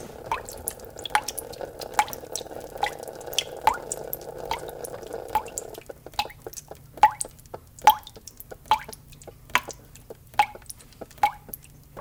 Water Driping 4
Shower Water Running Drip Toilet
running, shower, drip, water